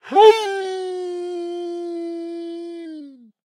Animal Dog Hound Howl 01
Animal Dog Hound Howl
Animal, Dog, Hound, Howl